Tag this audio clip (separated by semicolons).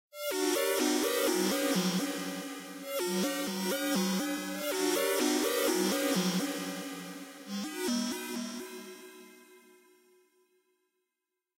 pad; synth